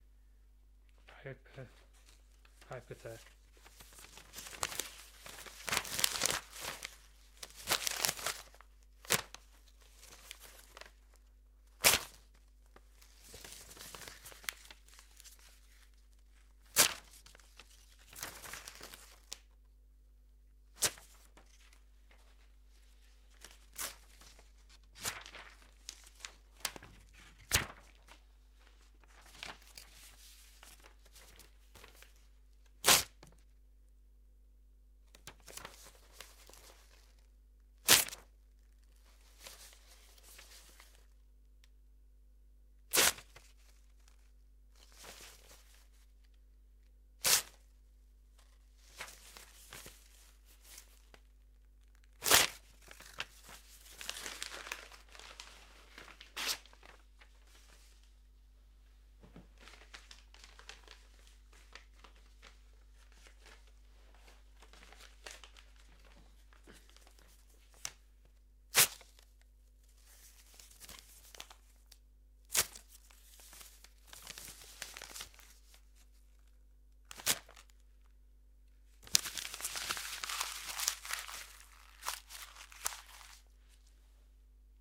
Paper Tear
Some sounds of pieces of paper being torn. Recorded with ME-66 into Tascam DR40
paper, rip, ripping, tear, tearing